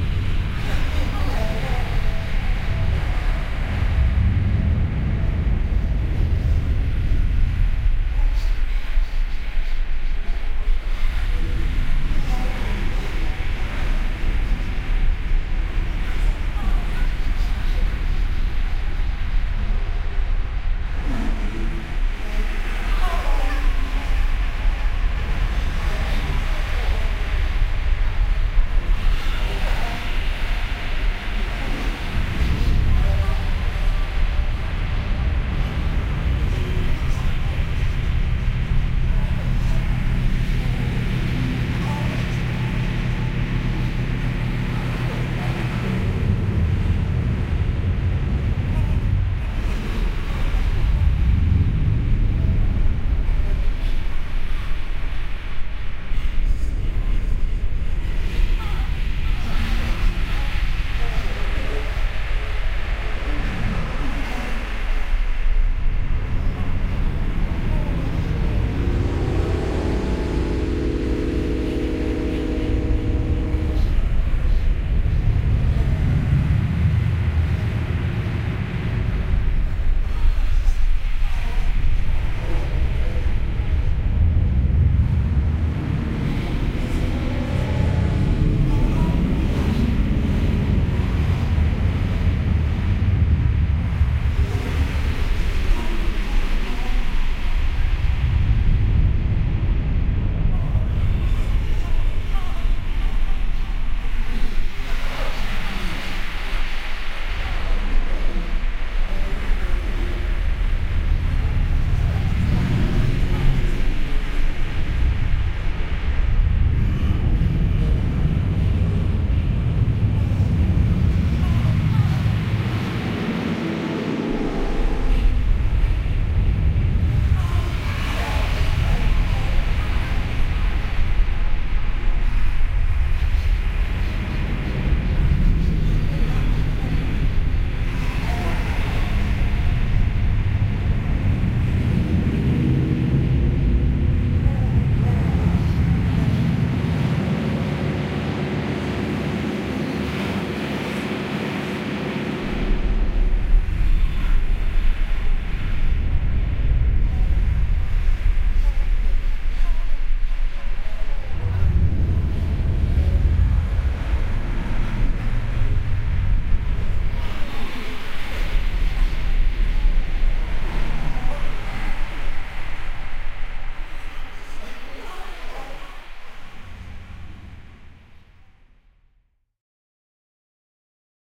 This is a sound imagination of sex astral
atmosphere, astral, sex